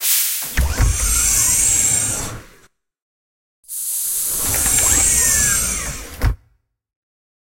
Spaceship compartment door.With pneumatics(8lrs,mltprcssng)
Sound design of a sliding door in a space station or ship. Consists of eight layers. Enjoy. If it does not bother you, share links to your work where this sound was used.
I ask you, if possible, to help this wonderful site (not me) stay afloat and develop further.
cinematic, compartment, cosmic, door, effect, effects, film, fx, game, gate, gateway, machine, movie, pneumatic-door, science-fiction, sci-fi, score, sfx, slide-door, sound, sound-design, sounddesign, space, spaceship, star